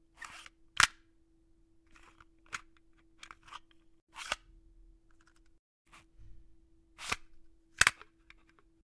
Sound of taking cassette out from box. (Multiple takes)
box, cassette, foley